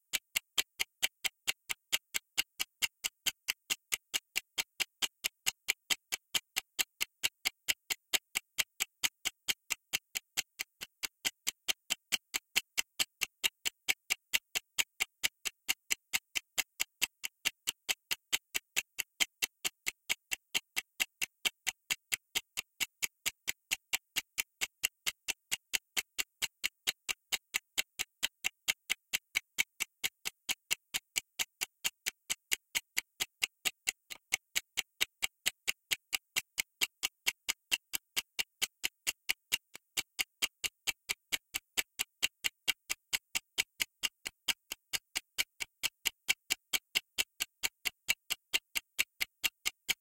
Ticking Timer
If you enjoyed the sound, please STAR, COMMENT, SPREAD THE WORD!🗣 It really helps!